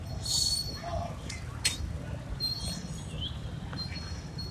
Registro de paisaje sonoro para el proyecto SIAS UAN en la ciudad de Palmira.
registro realizado como Toma No 04-reja metalica Calle 30 Carreras 24 y 25.
Registro realizado por Juan Carlos Floyd Llanos con un Iphone 6 entre las 11:30 am y 12:00m el dia 21 de noviembre de 2.019
Sounds, No, Of